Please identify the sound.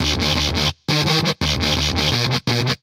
170 GutWobbler Synth 02
sounds, guitar, free, loops, filter, drums